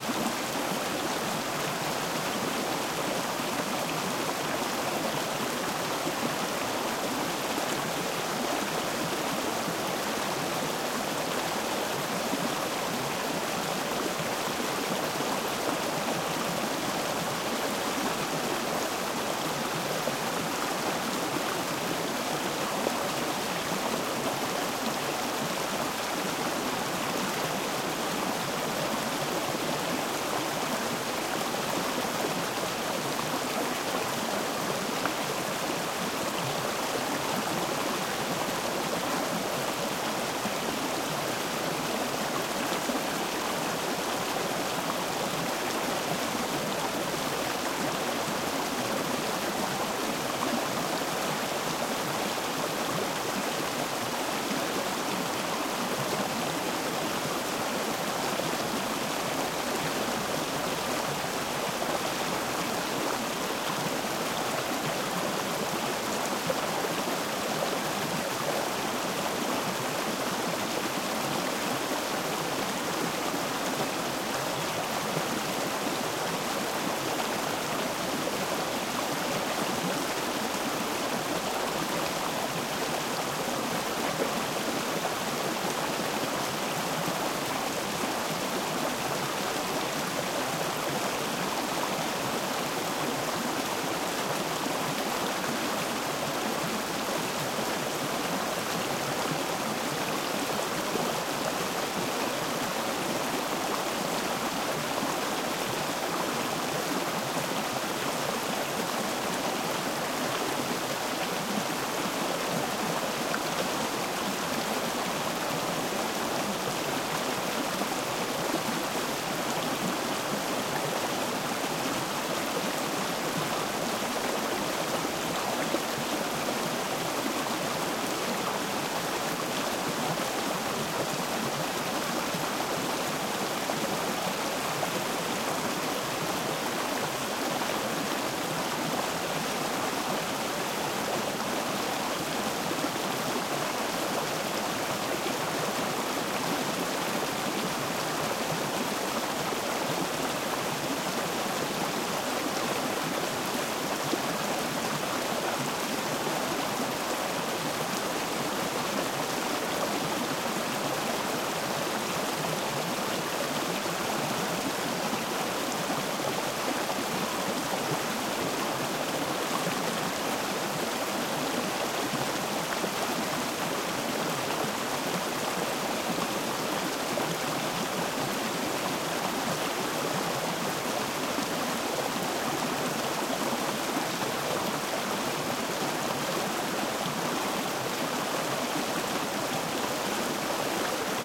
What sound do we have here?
small waterfall
Water falls down several steps in a small stream, quite noisily, recorded on Zoom H2 in the south of sweden.
creek, waterfall, flowing, stream, river, water, gurgling, babbling, rivulet, brook, running